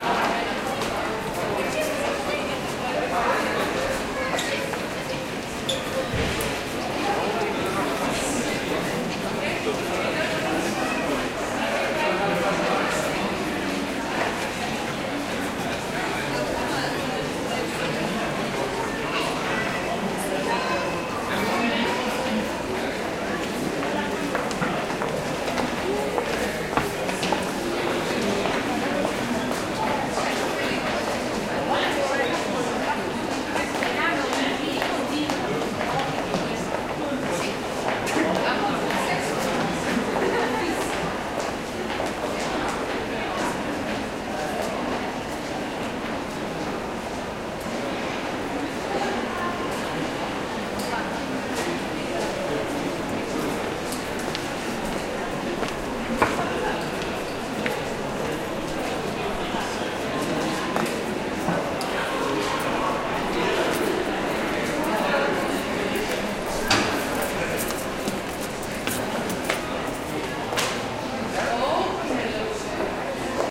The sound of people walking by me as I am standing just off the gallery situated in the Brussels city center. Tried to avoid the heavy reverb of the space. Some of it is still caught. Recorded with a Nagra ARES-M and the Nagra NM-MICS-II stereo mic.
phonography, people, city, citynoise, streetnoise, outdoor, field-recording